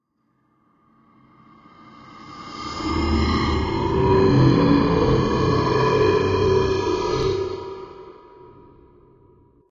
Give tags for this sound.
scary; creepy; unearthly; roar

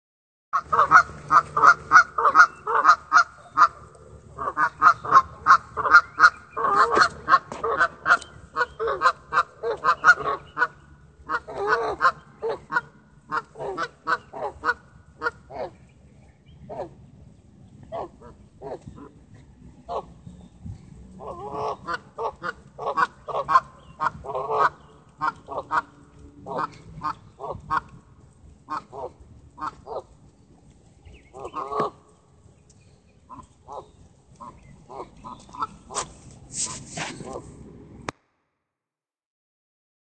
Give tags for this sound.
Birds; Waterfowl

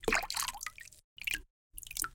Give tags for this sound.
Drops; water; Splash; Drop; Nature

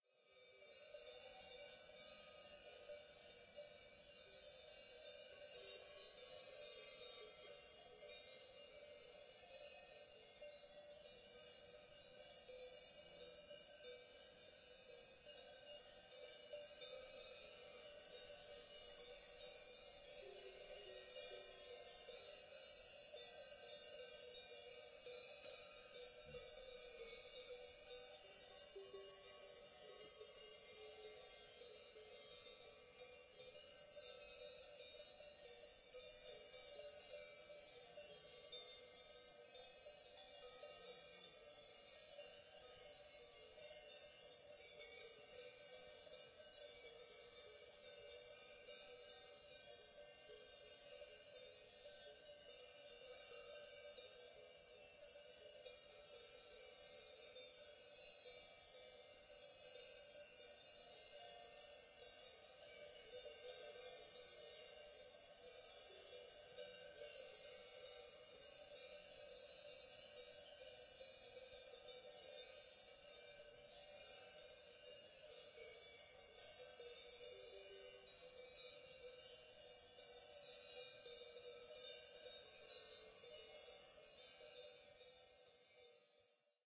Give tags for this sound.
cowbells; camping; mountains; cows; farm; distance; bells; distant; mooing; cow; mountain; herd; cattle

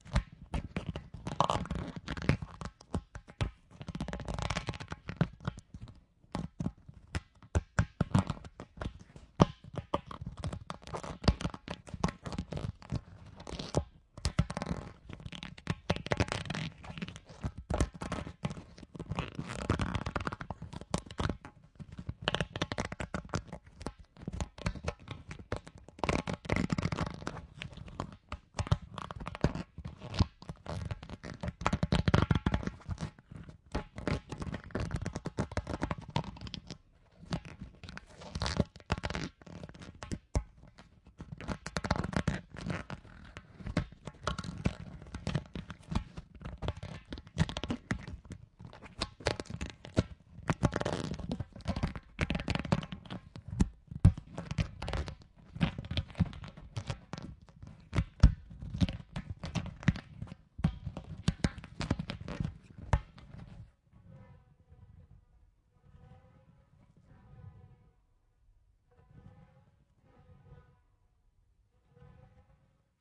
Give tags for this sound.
big; movement; rubber; rubber-ball; slow-movement; texture

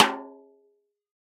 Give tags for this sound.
1-shot; drum; multisample; snare; velocity

